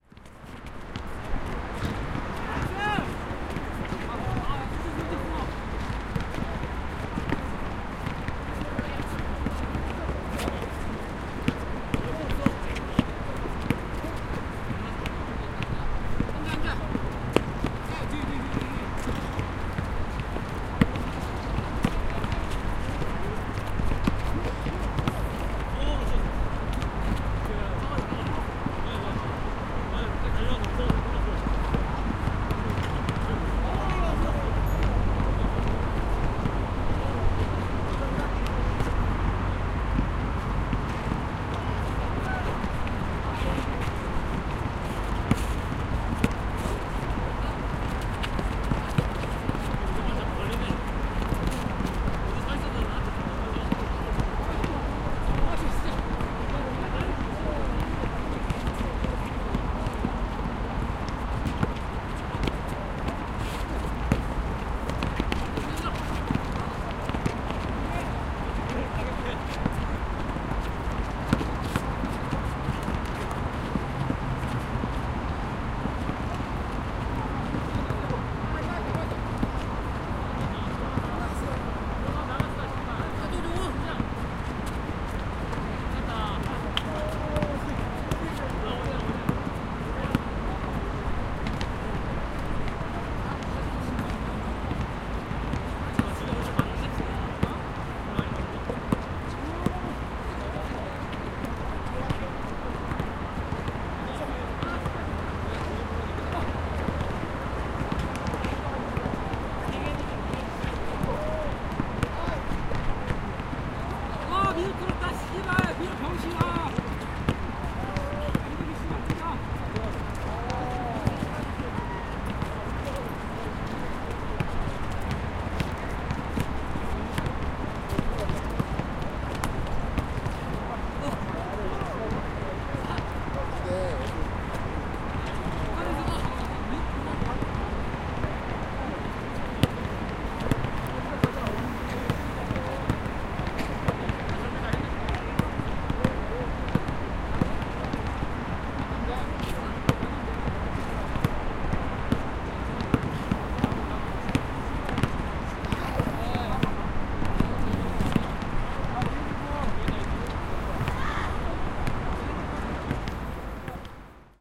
Playing basket and talking in Korean. Intense traffic in the background. Bicycle.
20120616
field-recording, seoul